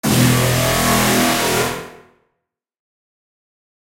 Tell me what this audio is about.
Dub Wub-2
synthesized, digital-monster, dubstep, transformers, wub, roar, ableton-live, bass